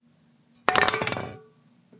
Can drop
Dropped a coca cola can